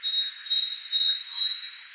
These are mostly blackbirds, recorded in the backyard of my house. EQed, Denoised and Amplified.